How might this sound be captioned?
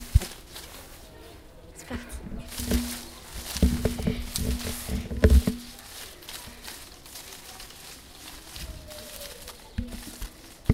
sonicsnaps fantine,lylou,louise,mallet
france, sonicsnaps